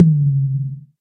drum hits processed to sound like an 808